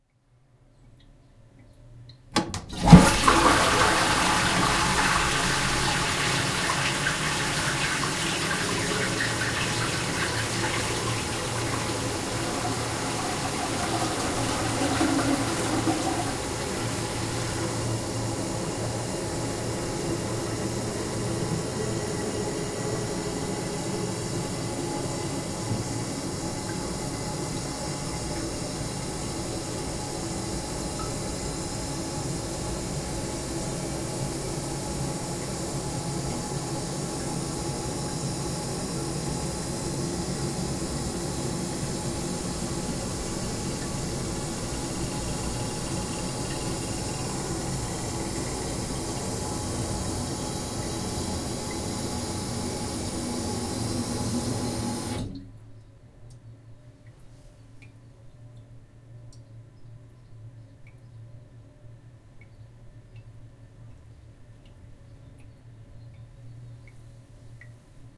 Toilet Flush
Toilettenspülung
Chasse d‘eau
Sciacquone
Inodoro
Stereo / Zoom H1
bathroom, flush, flushing, restroom, toilet, washroom